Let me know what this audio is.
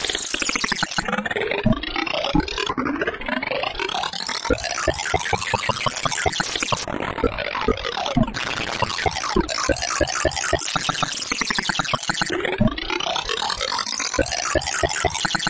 It has to be gross with a name like GroGurgle. A collection of pop slop and gurgle sounds created by the human mouth.